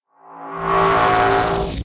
FX time unfreeze
A magical time unfreeze.